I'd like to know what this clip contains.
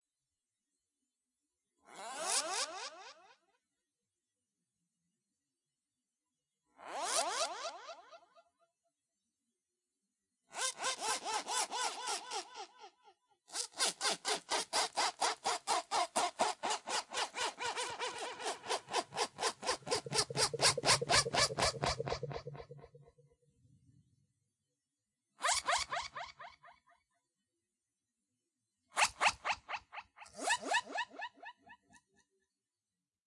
1 ufo conversation
Ufos having a talk